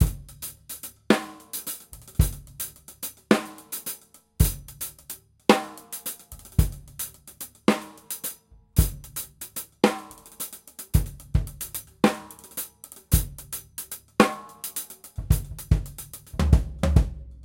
Hihat11 8m 110bpm
Acoustic drumloop recorded at 110bpm with the h4n handy recorder as overhead and a homemade kick mic.